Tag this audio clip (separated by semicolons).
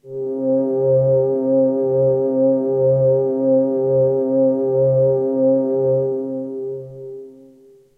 texture; soundscape; pads